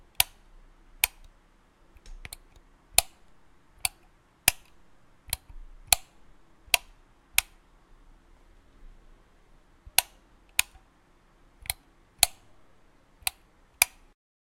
swihtches de luz electricas on off
on
off encendido apagado de interruptores de bombillos
button, click, hi-tech, off, press, switch